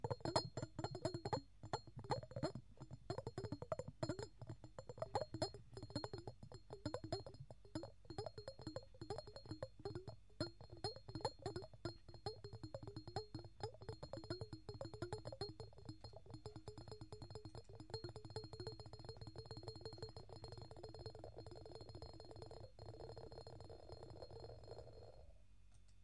Brass ball in Glass jar 2
A solid ball studded with brass tacks rotating in a convex glass bowl. Someone will find a use for it!
glass, metallic, spooky, Tinkling, weird